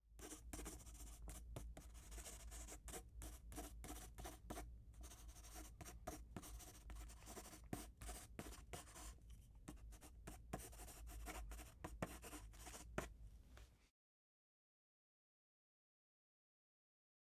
notepad pencil writing
a number 2 pencil writing on a notepad
notepad, writing, pencil